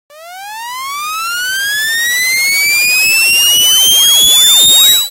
Squeal of transistors
In "The House of Blue Leaves" a character who is concealing that she is hard of hearing suffers a catastrophic failure of her transisterized
hearing aid. Afterwards she attempts to conceal that she is deaf by
pretending to understand what people are saying to her. This sound is
played for the audience at the point of failure to motivate her removal
of the hearing aid. I created it using the FM synthesis facilities of
Adobe Audition 1.5.
squeal, synthetic